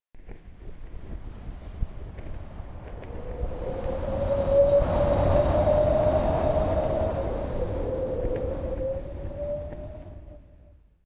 Curtians rustling finalcut
Curtains rustling with strong wind. Recorded with a Audio Technica AT897 into a Zoom H4N.